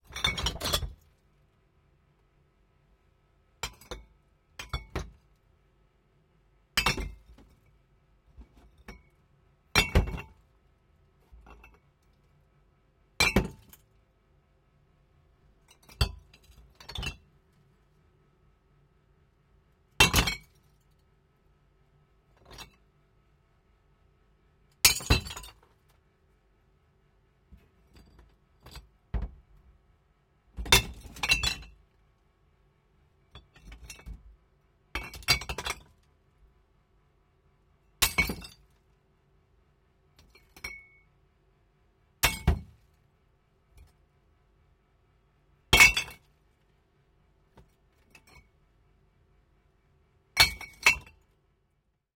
dropping a glass wine bottle in a bottle bin
crack, glass, shatter, smash